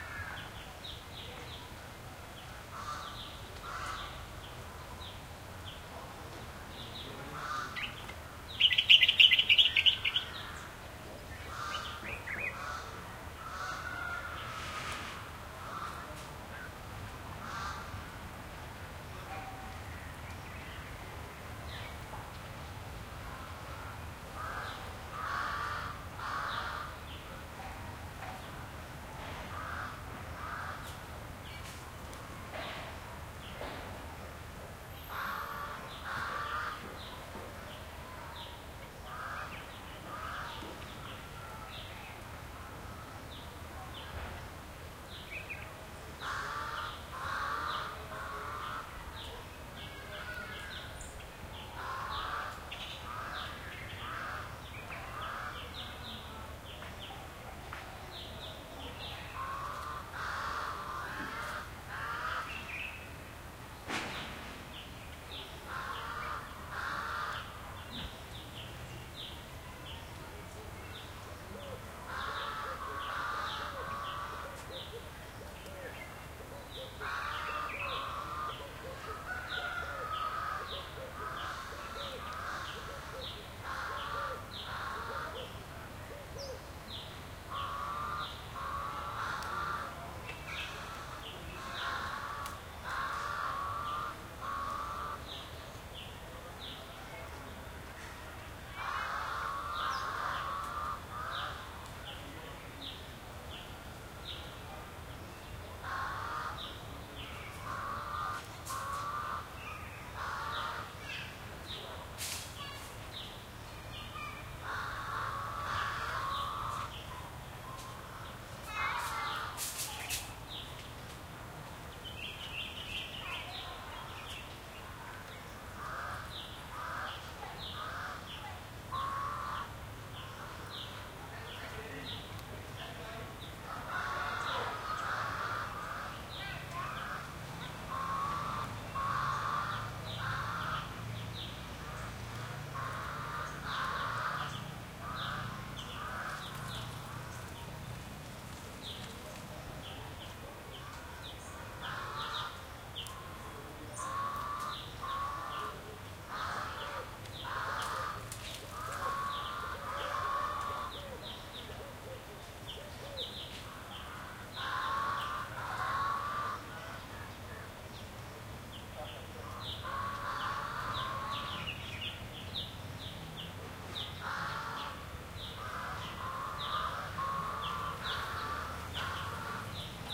backyard birds crows left distant sparse people activity and light traffic and crackly twiggy stuff end Mbale, Uganda, Africa 2016
backyard, birds, Africa, Uganda, crows